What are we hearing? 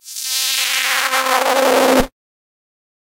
semiq fx 13
horror, fi, strange, sci-fi, sfx, sci, fx, freaky, effect, weird